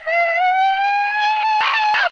Flowers Like to Scream 18
vocal, stupid, not-art, noise, screaming, yelling, psycho, very-embarrassing-recordings